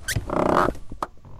Pressing down the clutch on a Volvo 740